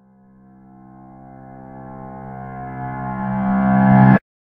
Piano reverse
Creation date: 14 - 60 - 2017
Details of this sound:
Subject of recording:
- Object : Upright piano
- Material : Wood and strings
- peculiarity : quite high
Place of capture:
- Type : Inside
- resonance : None
- Distance from source : above
Recorder:
- Recorder : Tascam DR-40 V2
- Type of microphone used : Condenser microphone
- Wind Shield : None
Recording parameters:
- Capture type : Mono
Software used:
- FL Studio 11
FX added:
- Edison : Remove noise, reverse spectrum and suppress reverb
reverse, instrument, effect, key, piano, note, upright, ropes